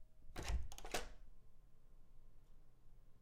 Internal door opening, close mic on handle. Recorded in mono with AKG SE300B condenser.
open, door